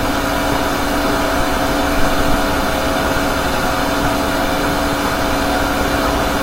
pc vent
PC-Vent recorded with headset microphone.added re-verb and hall effects (can be looped)
vent, industruial, airvent, ambient